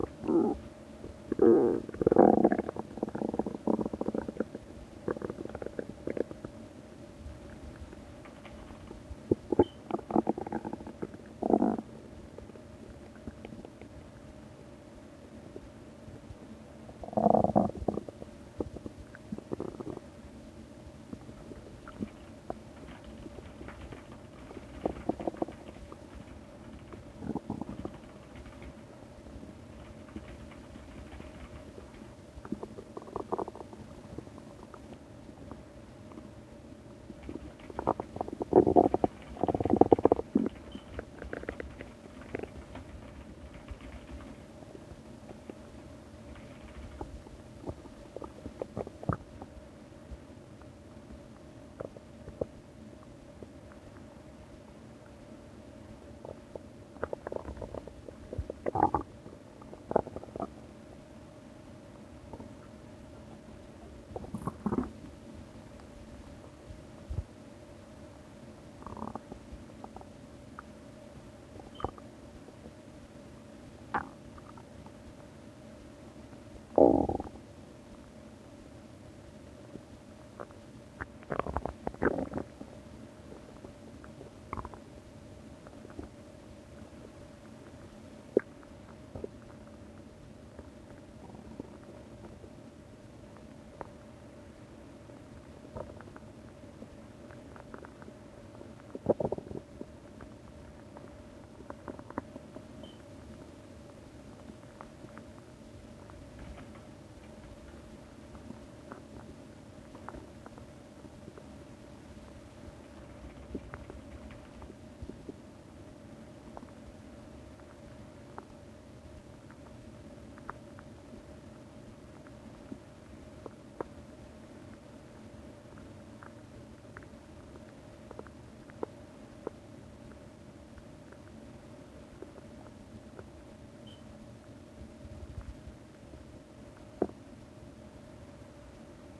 Angry guts. Featuring some really quiet sound from my mechanical keyboard in the background. Was hoping that wouldn't be picked up...